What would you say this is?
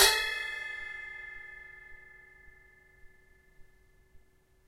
ride bell 2
Individual percussive hits recorded live from my Tama Drum Kit